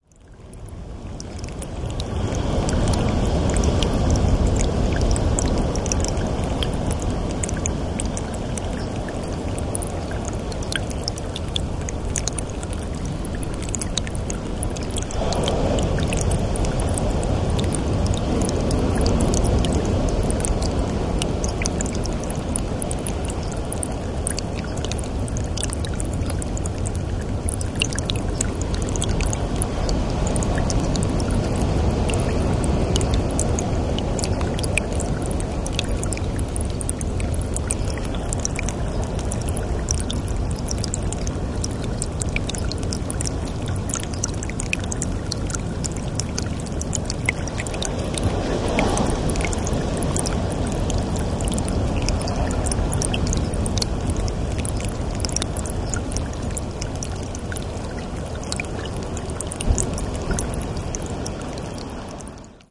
Following several rainy days, a stream trickles down the hill just North-West of Battery Crosby on the Batteries to Bluffs Trail - part of the Presidio, San Francisco. Waves crashing on the beaches and rocks nearby can be heard.